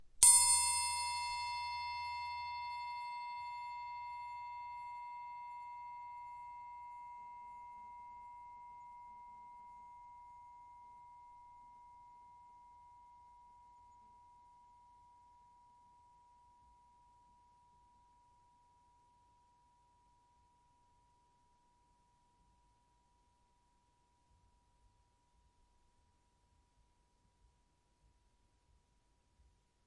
Punch to music triangle.
Recorder: Tascam DR-40.
Internal recorder mics.
Date: 2014-10-26.